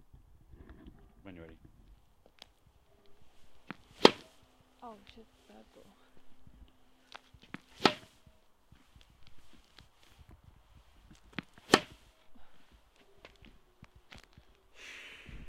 Tennis Ball being hit
Tennis Balls being hit by professional, using a rode mic and H4N.
ball, bounce, racket, raquet, sports, tennis, tennisball